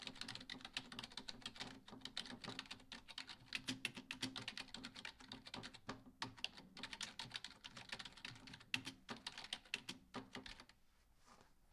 A keyboard is being typed.
keyboard, type, typing